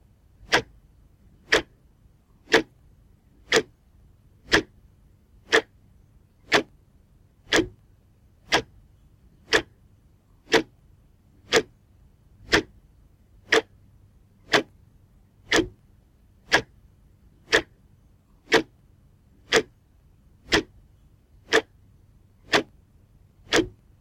Wall clock tick tack sound recorded, looping perfectly. This is a combination of three different recordings of the same clock, layered.
Recorded with a Zoom H2. Edited with Audacity.
Plaintext:
HTML: